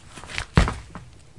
me jumping in front of the microphone inside my silent room.
step, shoe, footstep, jump